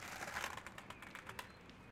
These field-recordings were captured for a radioplay. You can hear various moves (where possible described in german in the filename). The files are recorded in M/S-Stereophony, so you have the M-Signal on the left channel, the Side-Information on the right.